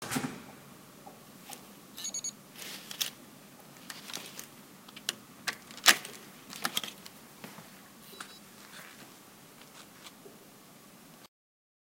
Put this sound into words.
MySounds GWAEtoy Doorunlocking
field, recording